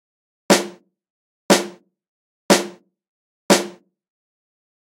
O Trashy Snare
I took a snare hit from a song I recorded on and tweaked the gate, eq, reverb. It's a vintage 4 inch wood snare.
trashy-snare, vintage-snare, gated-snare